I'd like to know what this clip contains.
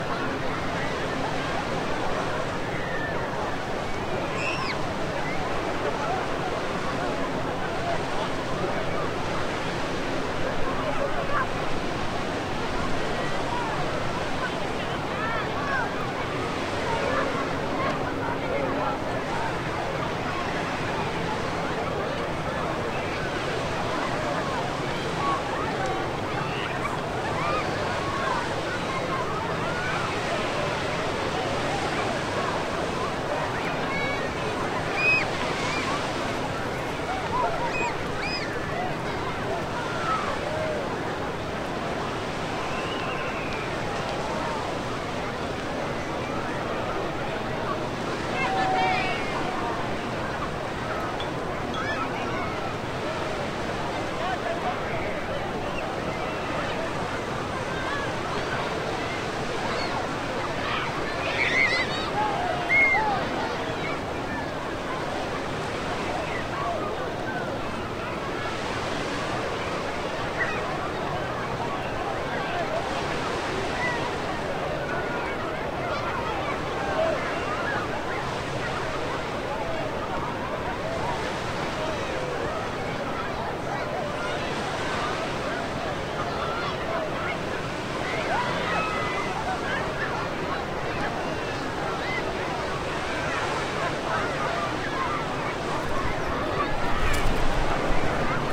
Summer beach sounds 1
Field recording of crowded beach in Bournemouth. Summer 2021 Children playing in waves. Jet skis in distance. From the West Cliff, Bournemouth. Recorded with hand-held Rode Videomicro and Zoom H4n Pro.
ambiance, Beach, children, cliff-top, field-recording, voices